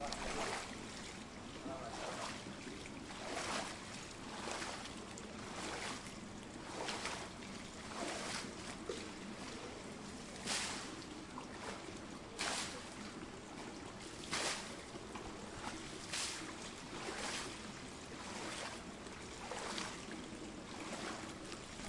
Espai wellness swimming pool
We hear a person swimming in an indoor swimming pool in a gym in Granollers.
Escoltem una persona nedant a una piscina coberta d'un gimnàs de Granollers.
Granollers, Swimming, Water, field-recording, swimmer